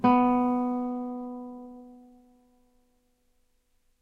1 octave b, on a nylon strung guitar. belongs to samplepack "Notes on nylon guitar".
guitar, tone, note, nylon, b, string, music, strings, notes